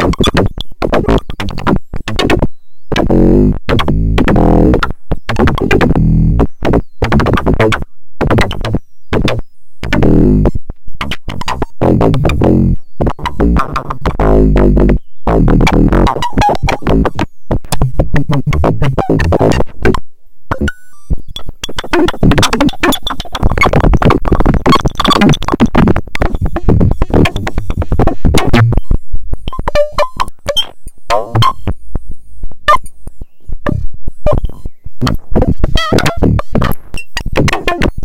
Source of uncertainty driven patch